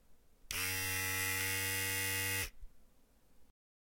Shaver - shaver eq close
Electric shaving machine.